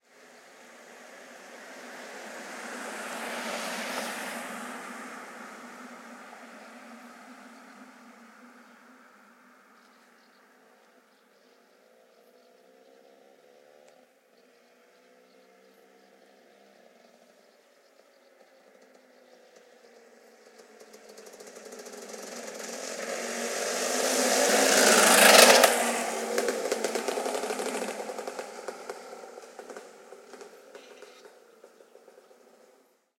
car and bike passing
ambience, car-passing, field-recording, motor-bike-passing